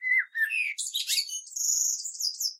Turdus merula 01
Morning song of a common blackbird, one bird, one recording, with a H4, denoising with Audacity.
field-recording, bird, nature, blackbird